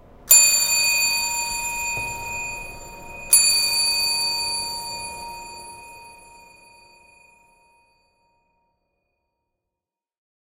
Bell reverb
A bell with a slight reverb added.
bell, reverb